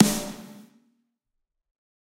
Snare Of God Drier 017
drumset, snare, pack, drum, kit, set, realistic